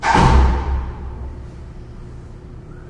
stairnoise slam
Sounds recorded while creating impulse responses with the DS-40.